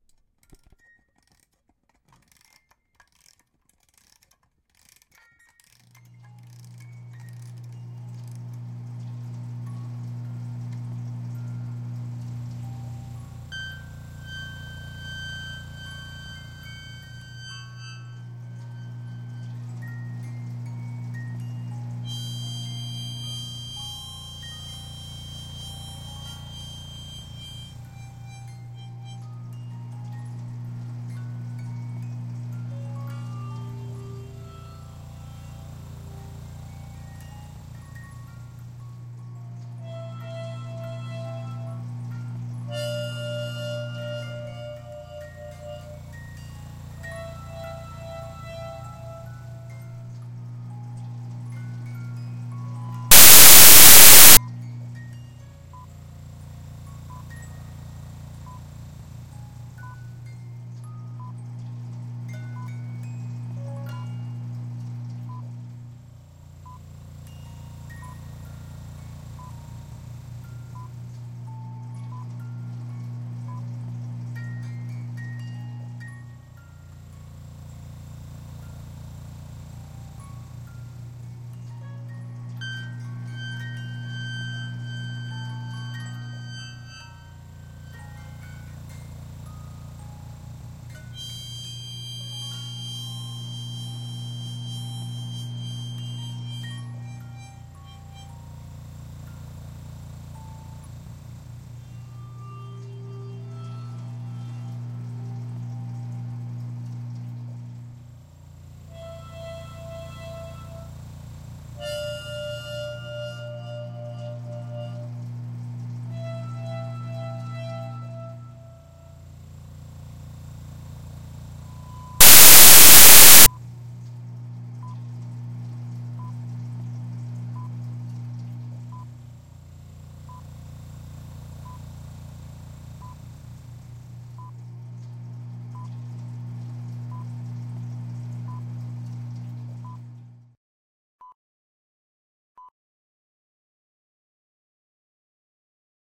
Machine Music Box
This is a piece I created combining the sound of my mother's music box being wound and played twice, alone with two projection machines, and a parrot harmonic that my father gave me.